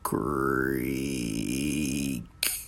Funny door creak
a person making the sound of a door creak for comedic purposes
person, door